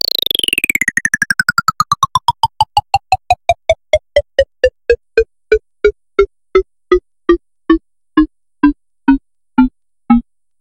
Clicker down long
A clicker sound with changing speed.
Clicker, Landing, FX, effects, SFX